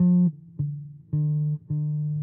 recording by me for sound example for my course.
bcl means loop because in french loop is "boucle" so bcl
bass, loop